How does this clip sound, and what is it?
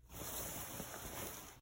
the ruffling of cloth